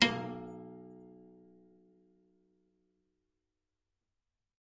Tiny little piano bits of piano recordings
sound, piano, live